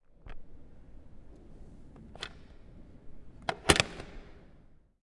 STE-011 Telephone Take and leave
University telephone: take and hang.